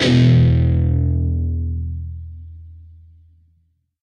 Dist Chr E5th pm up
Standard E 5th chord. E (6th) string open, A (5th) string 2nd fret, D (4th) string, 2nd fret. Up strum.
chords, distorted, distorted-guitar, rhythm